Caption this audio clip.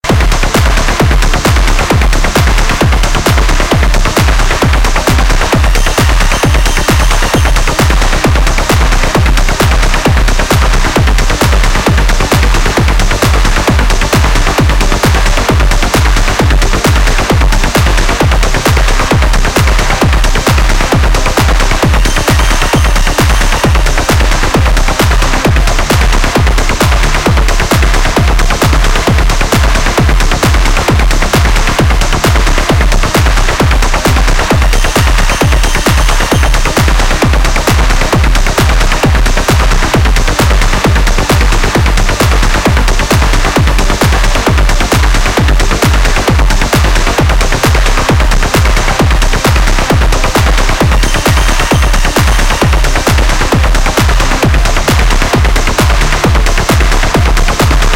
best trance by kris klavenes
did this on keyboard and did the rast on Ableton :)
kris-klavenes, trance, dance, loop, club-rave